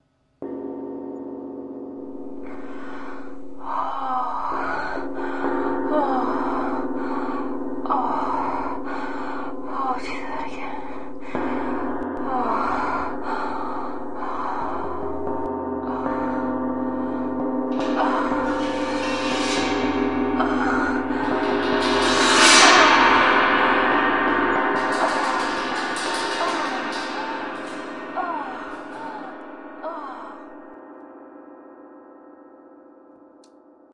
Girls gong wild
Resonant metals can be very sensual.
gong, metal, scrapes